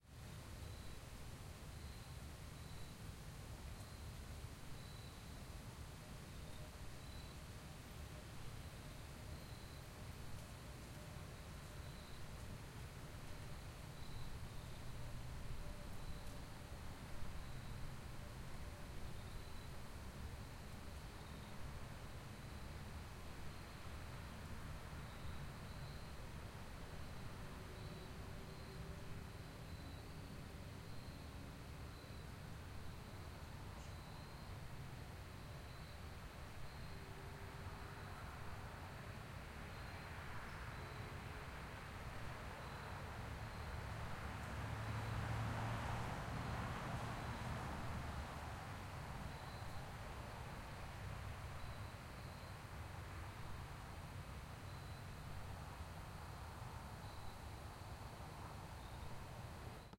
Suburb fall night light traffic
A fall night in a suburb. Light traffic nearby.
night, fall, traffic, suburb, light